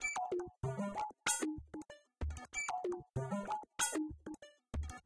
A minimal/techno sort of loop at 95 BPM. Created with a sequenced self-oscillating filter, manipulated with various effects.
echo, electronica, glitch, minimal